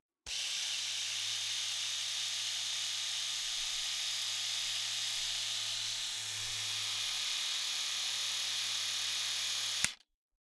Electric razor 1 - normal mode
A recording of an electric razor (see title for specific type of razor).
Recorded on july 19th 2018 with a RØDE NT2-A.